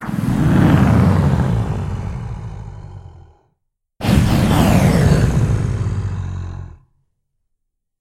Dragon Roar
Dragon scream made from voice and effects in Audacity. Mostly time skew used. These tutorials allow you making similar sounds: Monster Laugh, Monster Voice, Lion Roar.
creature, creep, dinosaur, dragon, enemy, evil, game-design, moan, monster, roar, scream